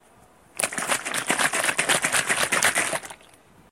Liquid bottle shaking short
Liquid shaking sound
water, Liquid